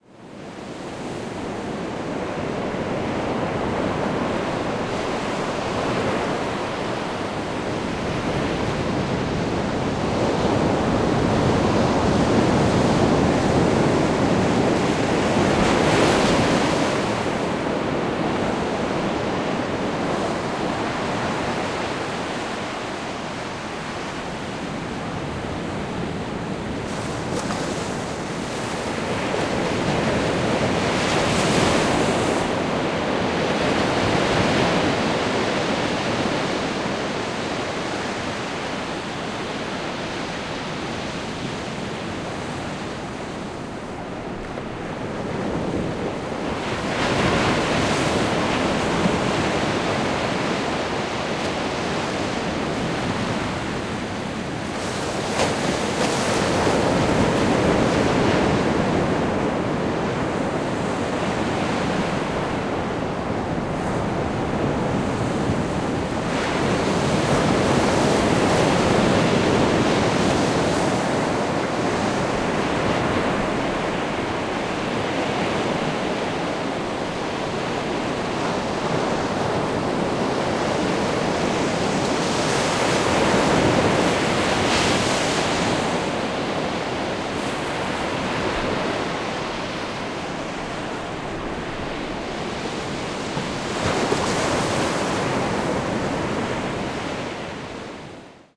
Medium rolling surf coming onto the a shallow sloping sandy beach, recorded from about 10 meters from the water.